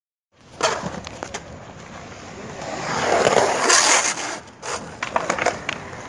A fs powerslide done with a skateboard on polished concrete, emiting that classic scratchy delicious sound
field, Powerslide, recording, skate, skateboard, skateboarding